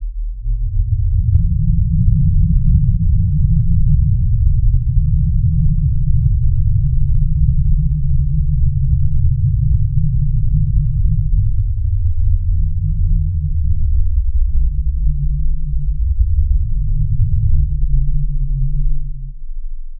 Coagula Science! 9 - Rumble!
Synthetic sound.
Made in Coagula.
startrek, epic, shaking, down, energy, fiction, noise, rumbling, sci-fi, rumble, shock, scifi, star, starwars, cinematic, ship, quake, starship, science, earthquake